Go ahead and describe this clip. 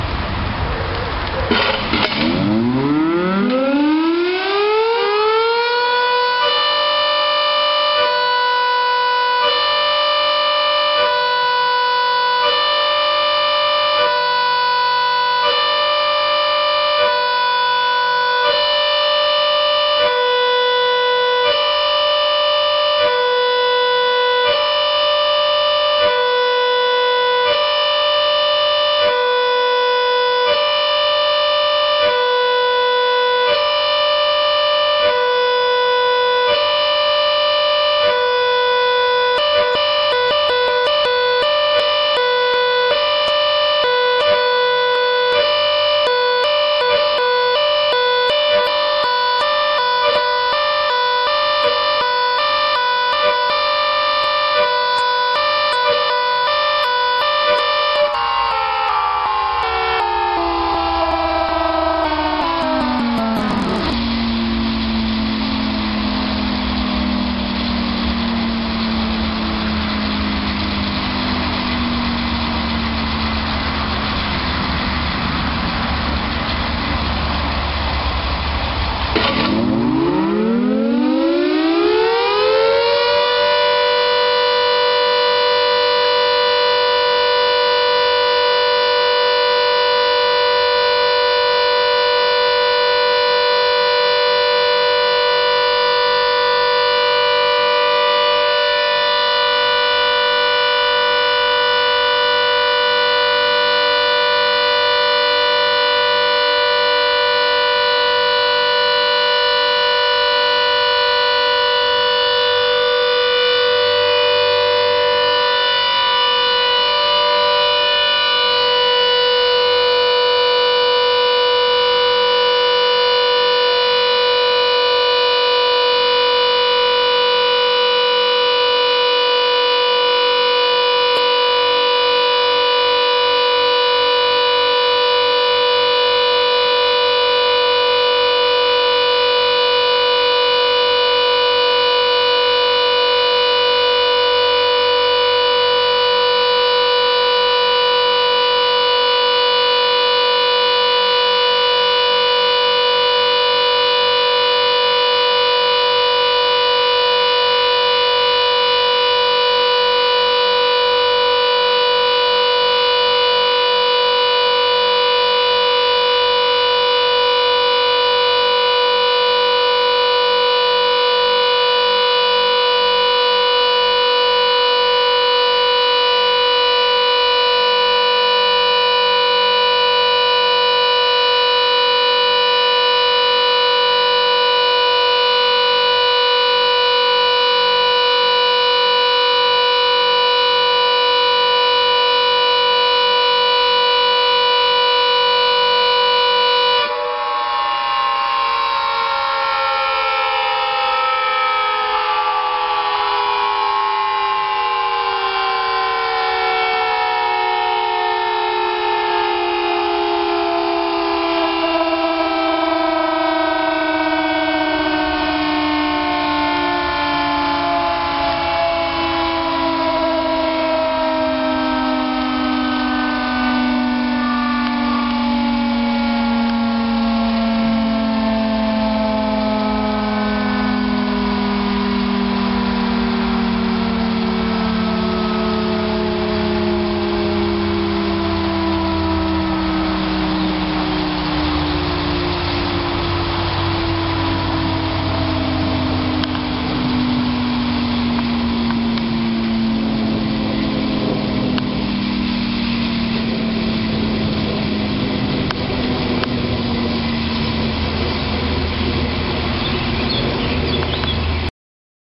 Broadmoor Hospital Siren Test.
The Broadmoor Siren
Every Monday morning at ten o'clock the deafening sound of an air-raid siren disturbs the peace over a large area of the leafy commuter belt that is Berkshire, UK. The signal sounds the familiar alternating high-low note, followed by a constant all-clear note five minutes later.
It's not a re-enactment of the Blitz; it's a test of the escape alarm at the local mental hospital, Broadmoor.